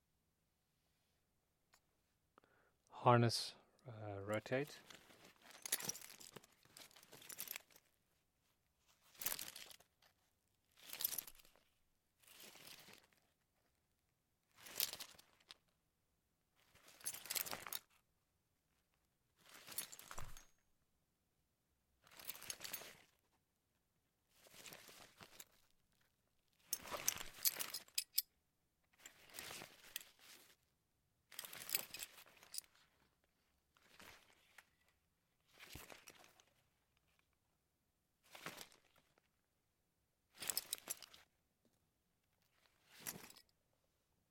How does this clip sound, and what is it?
harness rustle foley
foley, harness, rustle